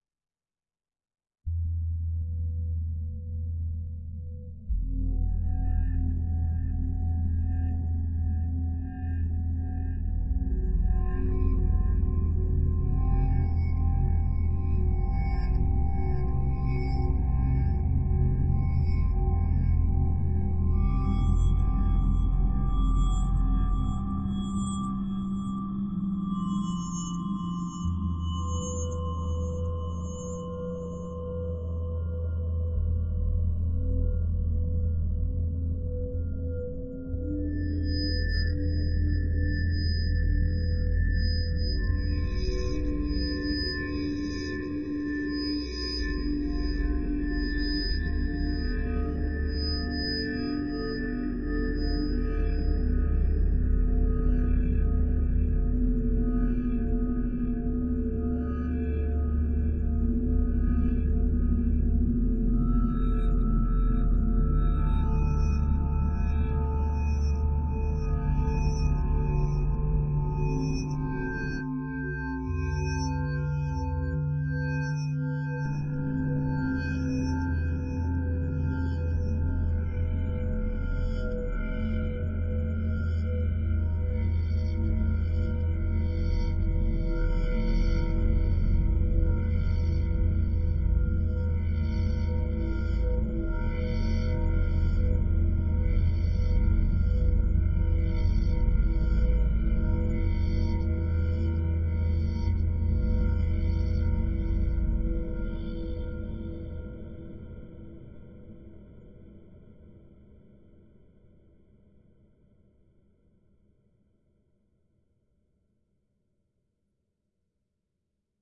A drone soundscape generated in the u-he software synthesizer Zebra, recorded to disk in Logic and processed in BIAS Peak.
drone, electronic, hypnotic, metallic, soundscape, synth, Zebra